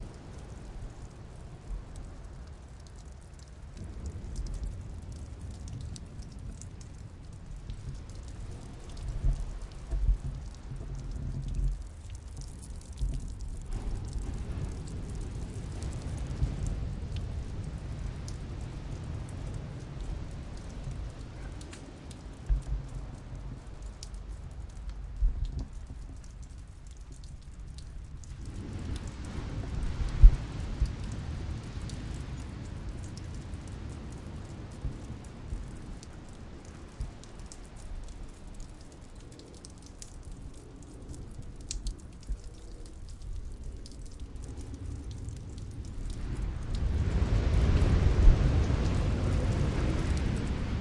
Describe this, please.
Drips 1 161205 mono
Constant drips. Waves, very distant. Some handling noise. - recorded on 5 Dec 2016 at 1000 Steps Beach, CA, USA. - Recorded using this microphone & recorder: Sennheiser MKH 416 mic, Zoom H4 recorder; Light editing done in ProTools.
beach, field-recording, ocean, water, waves